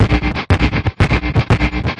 ground loop 11
created by shorting 1/4' jack thru a gtr amp